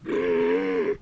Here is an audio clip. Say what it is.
Monster Bellow 7
creature, monster-bellow, fantasy